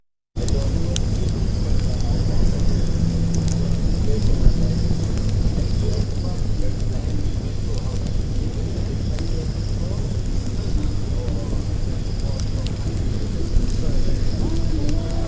Recorded in Bangkok, Chiang Mai, KaPhangan, Thathon, Mae Salong ... with a microphone on minidisc